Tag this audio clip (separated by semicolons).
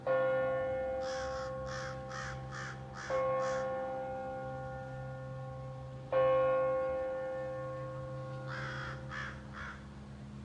Bell; Crows; Ominous; Ringing